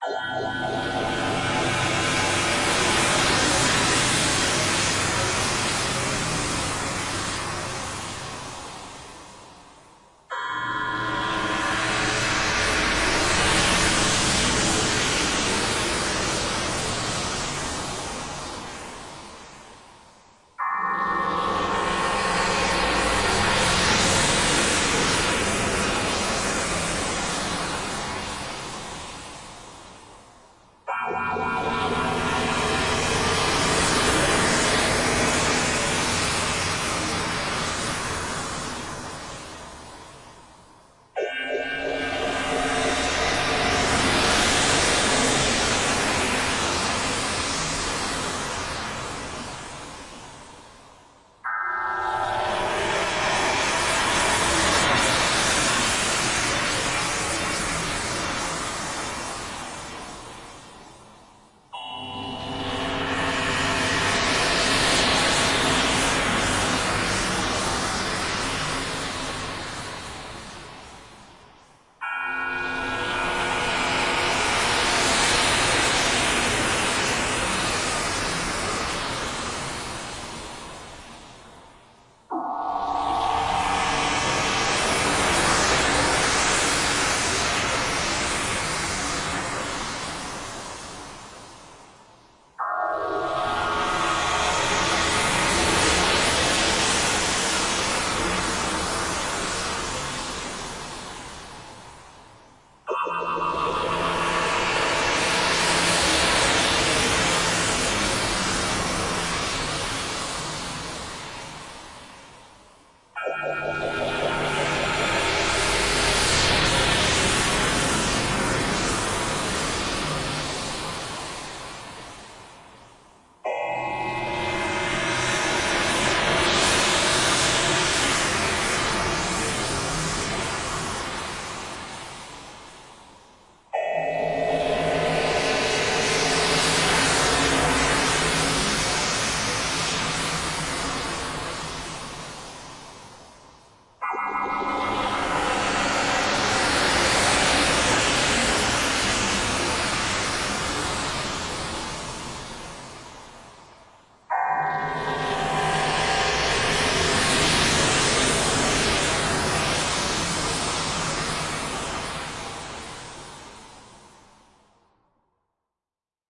Horror sounds 1
This sound is a set of bright atonal horror pads / stabs.
atonal, consequence, discovery, failure, fatality, halloween, horror, jolt, stab